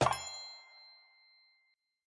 1UP video game
I made this sound to use in a video game whenever the player completes a quest, gets a new item, gets a 1UP, etc.
1up, coin, game, game-sound, new-item, platformer, rpg, video-game